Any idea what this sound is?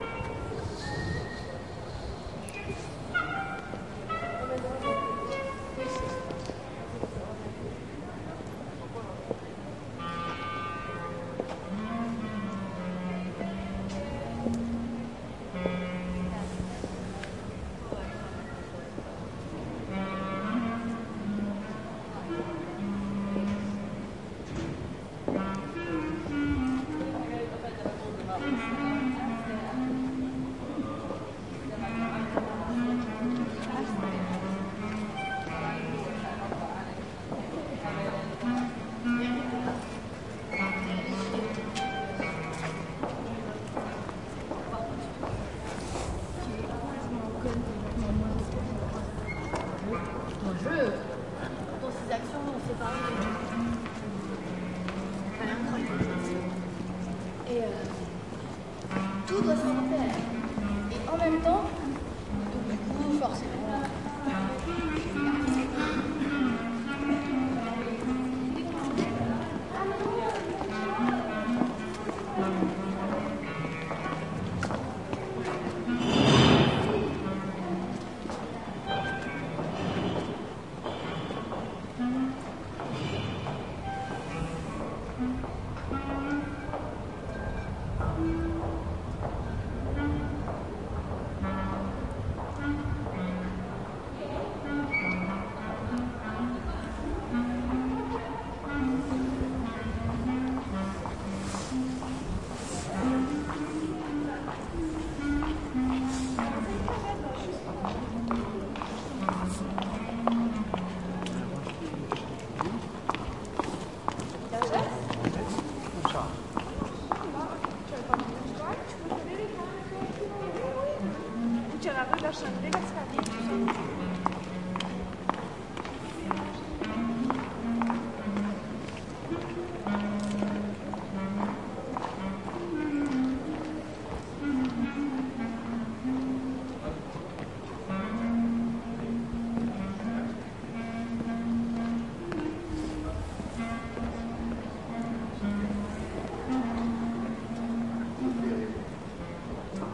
Recorded on windy evening 2.3.2008. Sitting in stairs ofchurch Saint Roch . Someone playing clarinet , people passing by. Recorded with Zoom H 2